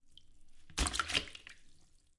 Throw rag to bucket of
water